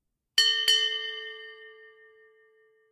Ship Bell Two Chimes
Two chimes of a small bell used on ships, (can also be used for old firefighters).
bell, ding, dong, fire-bell, naval, ships-bell